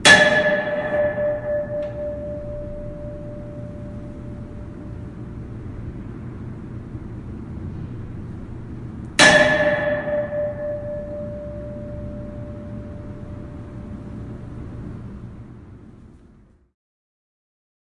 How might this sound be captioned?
metal-pole-staircase
Hitting a metal pole with a small metal gate, at the bottom of a stone-wall lined 10 story stairwell. The first hit wavers a bit more, while the second hit carries more conviction.
clang, hit, impact, industrial, metal, metallic, percussion, pole, ring, stair, strike